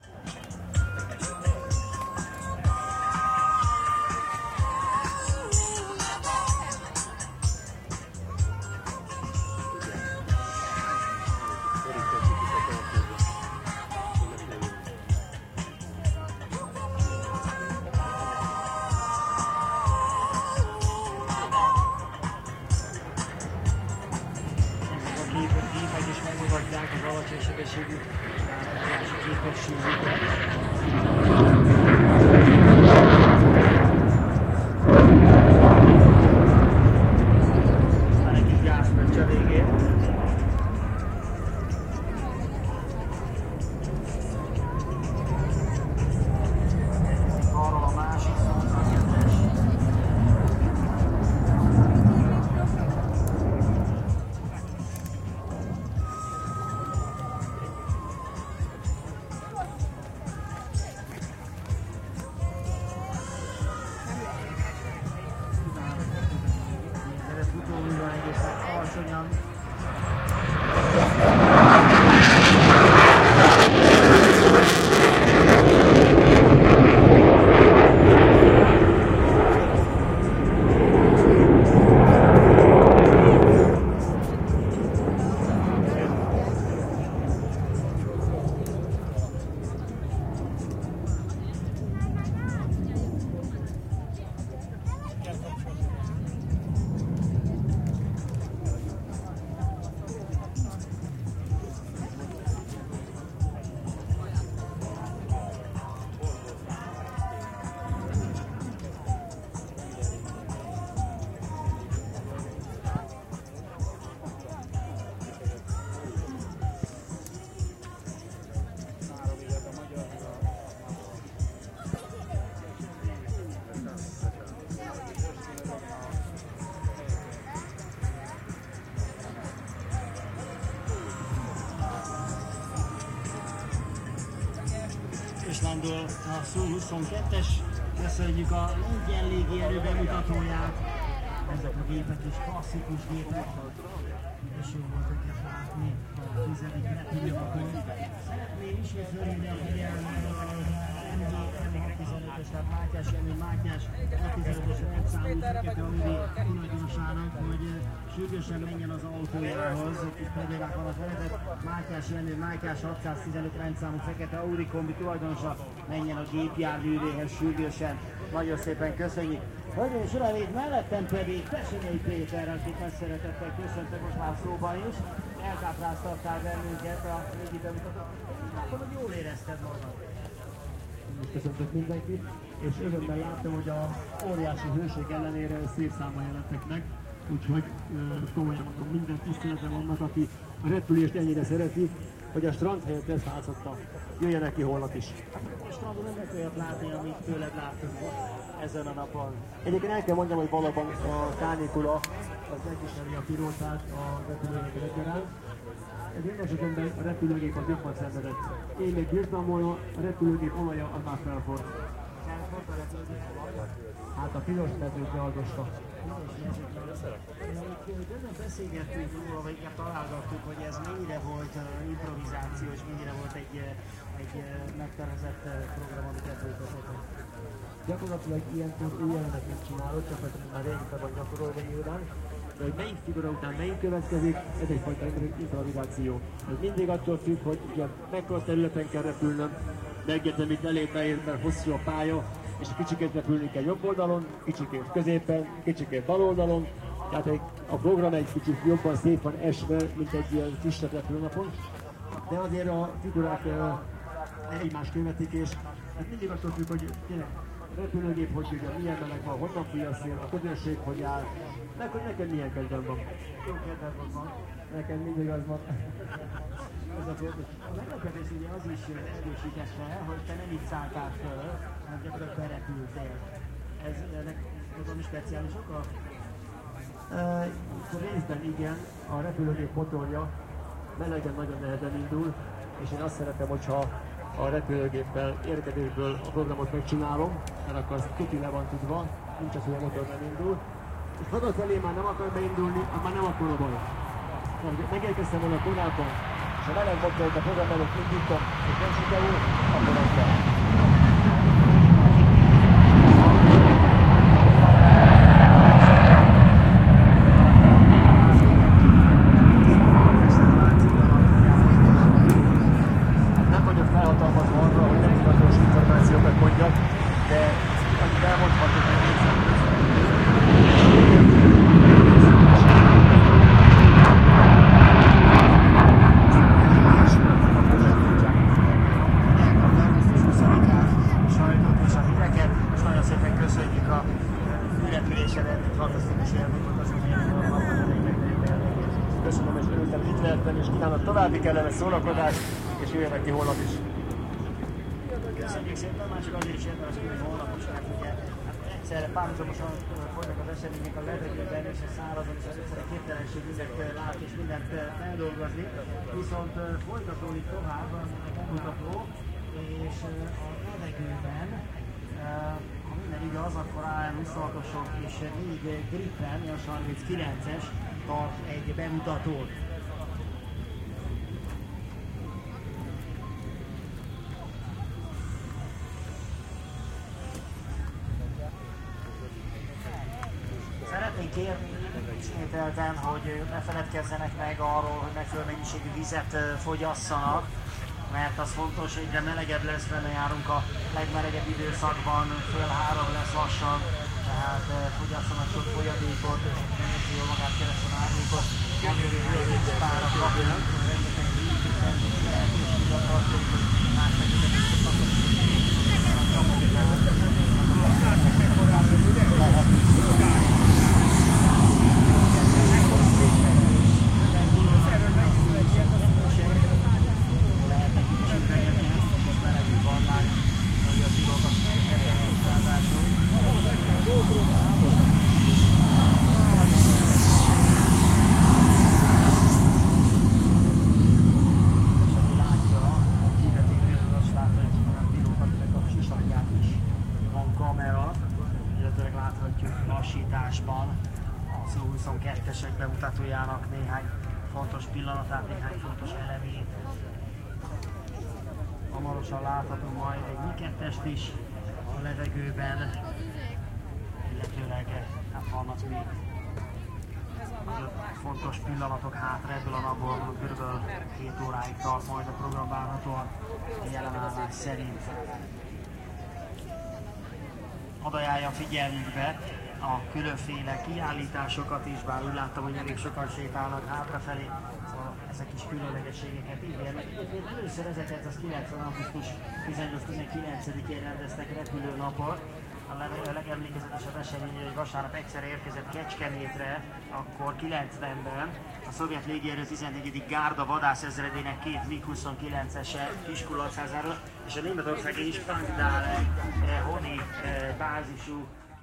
These are some sounds of aircraft during the Kecskemét International Airshow in Hungary. Recorded by SONY stereo dictaphone.
thunder, sonic, boom, airshow, plane, jet, explosion, shockwave, aeroplane